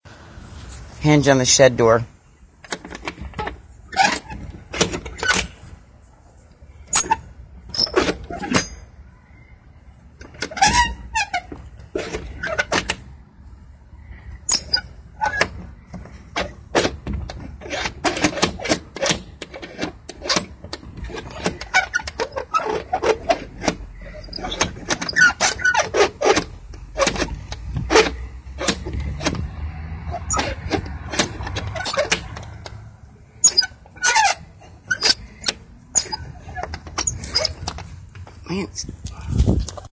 Shed door latch
recording of my wooden shed door with a metal latch being turned and opened.
rusty, latch, close, squeaky, creaking, wooden, wood, lock, handle, hinge, door, squeaking, creak, squeak, open, hinges, creaky